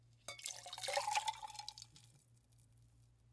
Slower and softer pour of liquid from martini shaker into glass with ice, ice hitting sides of glass, liquid sloshing